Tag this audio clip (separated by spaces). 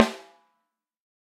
tama velocity multi fuzzy snare sample 13x3 drum sm7b shure